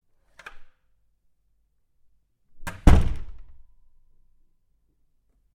A wooden door opening and closing. Neumann KMi84 cardio, Fostex FR2.